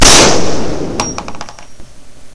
DEagle wCasing
This is a self-made recording of a .50 AE Desert Eagle firing, and the sound of the casing hitting the stand